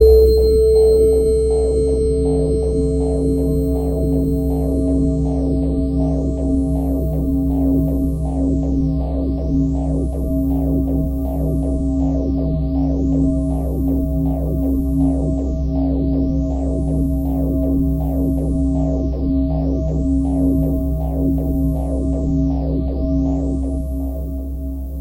Spacepad80bpm
8 bars pad with a spaced vibe. 80 bpm